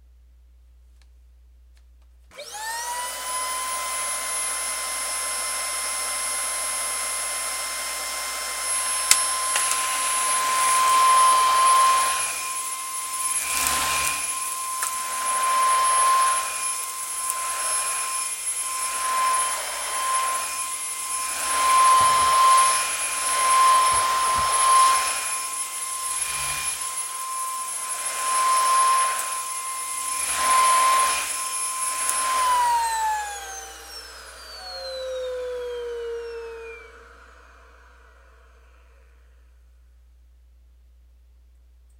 The sound of a vacuum starting vacuuming and stopping.